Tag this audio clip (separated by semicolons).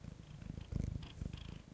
cat; cat-sounds; feline; purr; purring